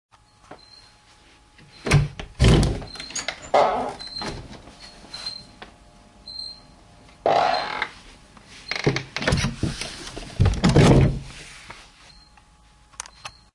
A noisy door opening and closing.
Creeky-door, Door, Door-close, Door-open